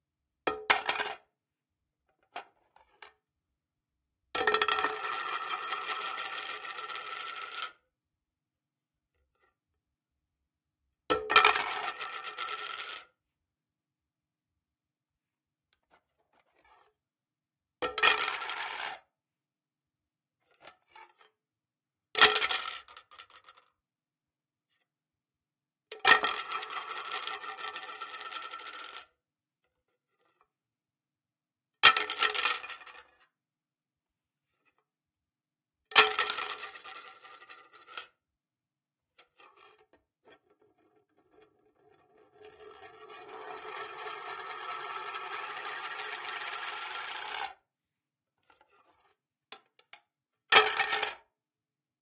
Plate Plastic Ceramic Dropped On Floor Pack
Breaking, Breaking-Glass, Broken, Ceramic, Cleaning, Clink, Clinking, Crack, Cracking, Crash, Cutlery, Ding, Dinner, Dong, Foley, Fork, Glass-Jar, Hit, Jar, Kitchen, Knock, Metal, Plastic, Plate, Shatter, Shattering, Table